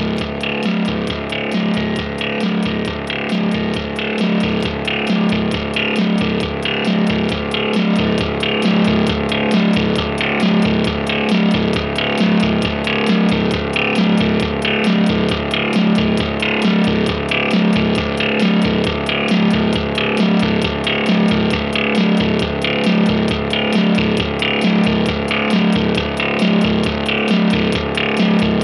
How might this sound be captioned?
lo-fi cold robot beat
factory, industrial, robot